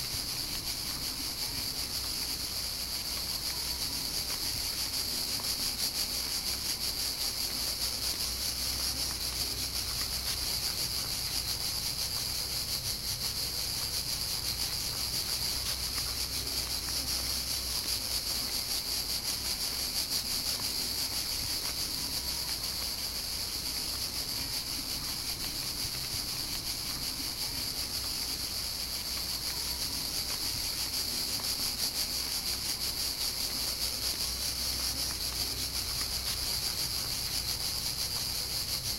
From summer 2008 trip around Europe, recorded with my Creative mp3 player.Chicharra bugs near the beach in Eze, France
bugs, nature